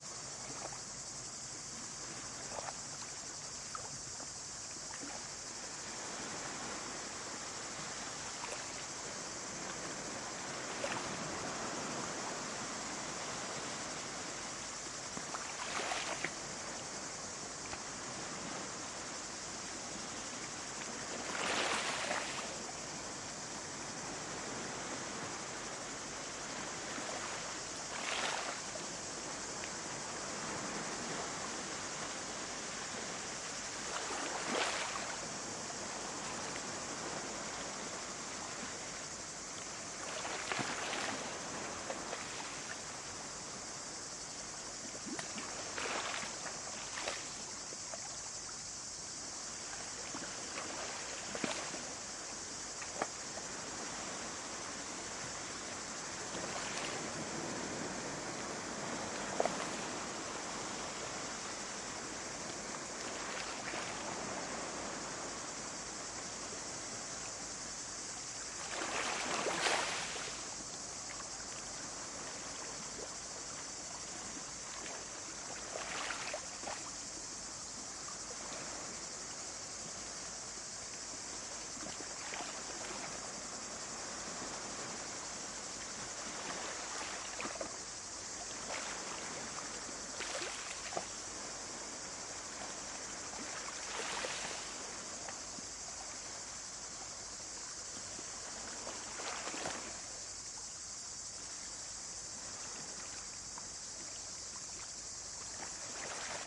BGSaSc Greece Waves close Gravel Beach Crickets Cicades 04
Waves close Gravel Beach Crickets Cicades Greece 04
Recorded with Km 84 XY to Zoom H6
Beach, Cicades, close, Crickets, Field-Recording, Gravel, Greece, Sea, Waves